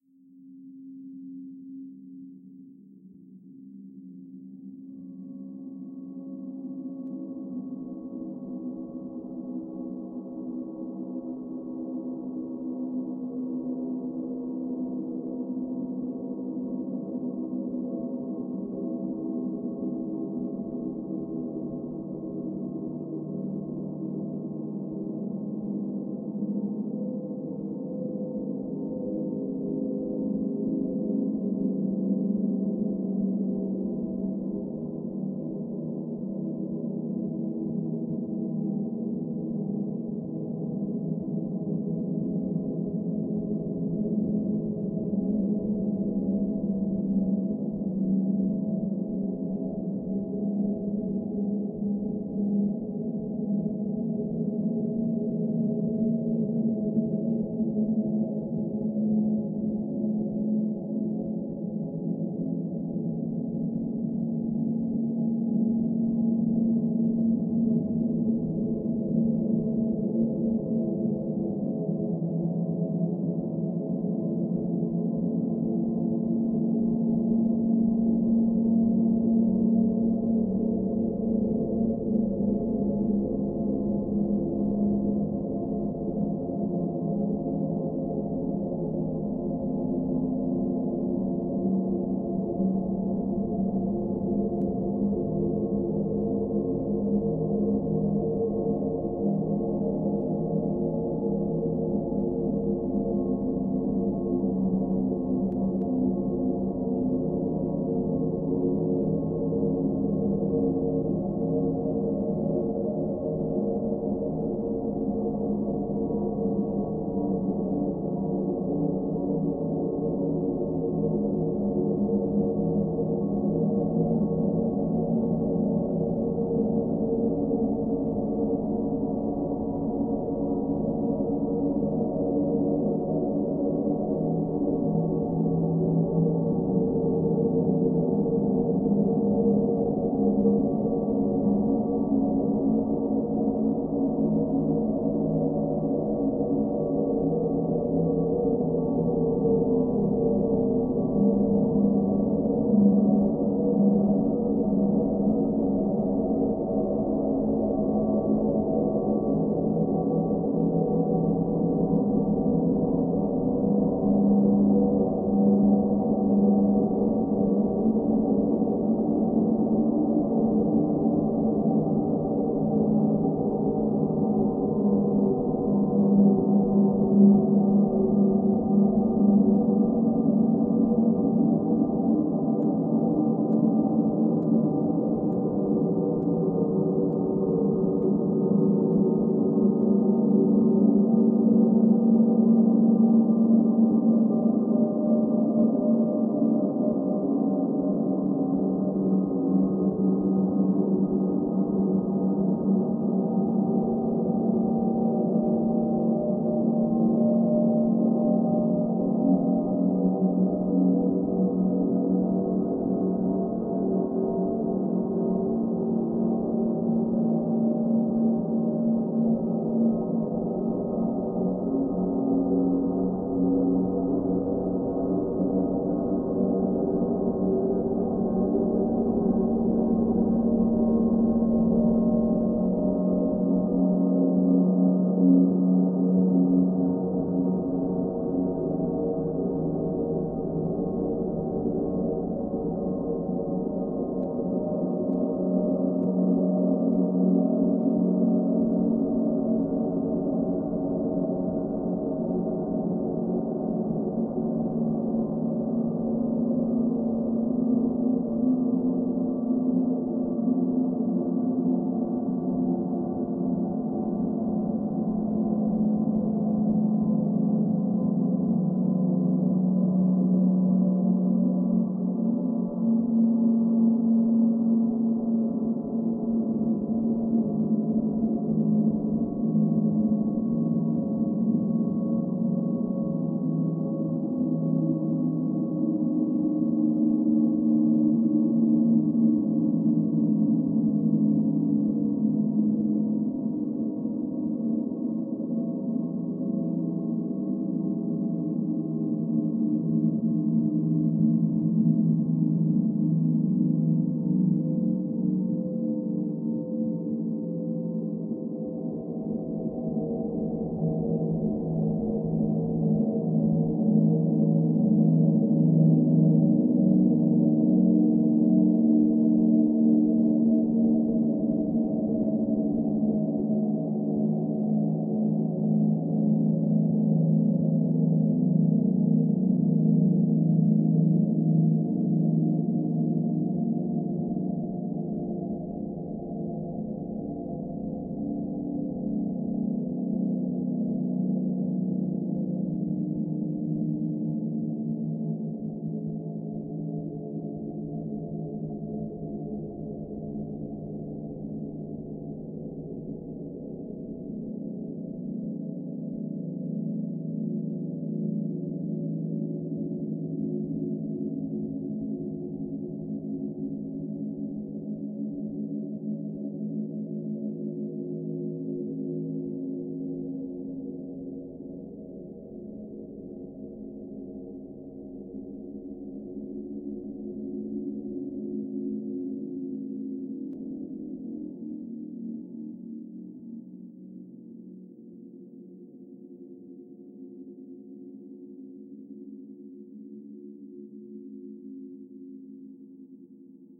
Layered sounds recorded outdoors in an ambient setting over time and compressed.
Effected and mixed in Audacity using a 2013 Macbook Pro.
background, background-sound, sound, soundscape